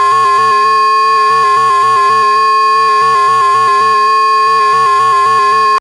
quantum radio snap069
Experimental QM synthesis resulting sound.